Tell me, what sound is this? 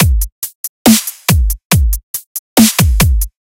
Just some dubstep drums. Made in FL Studio 12. Samples from Vengeance Dubstep 2 with some compression.
dubstep, drums, beat, 140bpm